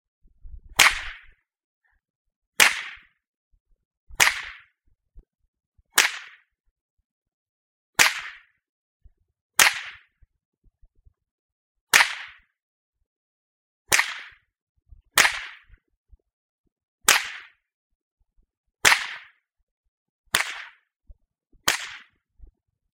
Whip Cracks Sound Pack
More whip cracks for you Western fanatics. Hope you like them, they do seem to sound pretty good in my opinion.
western, pop, snap